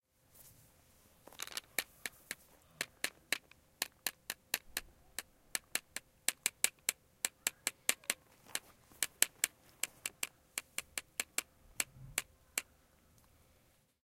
Sounds from objects that are beloved to the participant pupils at 'Het Klaverblad' School, Ghent. The source of the sounds has to be guessed.